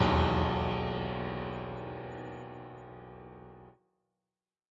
Content warning
A sinister sound signaling that something bad is about to happen. Made with an Arturia Keystep keyboard and FL Studio 20.
The sound of a quick spin/woosh, like a piano discord.
anxious, bad, bad-things-are-about-to-happen, creepy, drama, dramatic, eerie, evil, fear, fearful, film, frightful, gemesil, haunted, horror, imminent, keyboard, omen, piano, scary, shady, sinister, spooky, surprise, suspense, terrifying, terror, thrill, unexpectedly